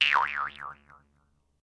jaw harp15
Jaw harp sound
Recorded using an SM58, Tascam US-1641 and Logic Pro
boing, bounce, doing, funny, harp, jaw, silly, twang